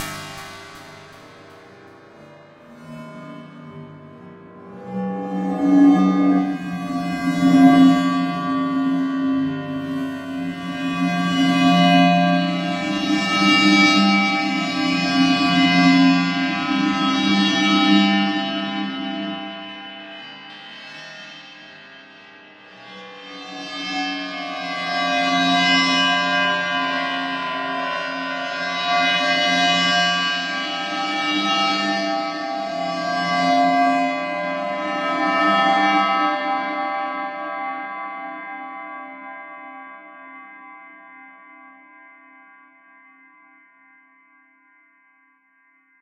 Sinister ambient sound with a lot of reverb and some dramatic background melody.
Gothic, dramatic